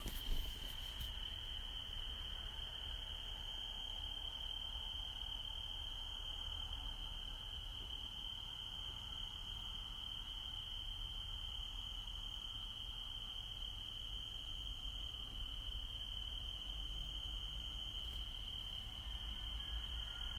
sound of the night
I recorded this sound from my summer house in Antalya Turkey
ambiance, field-recording, insects, nature, night, summer